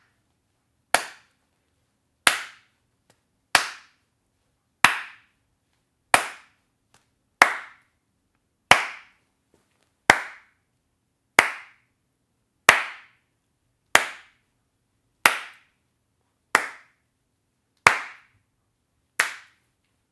clap-your-hands
Clapping hands on a rythm with interval making different variations.
clap, different, hand, hands, interval, variations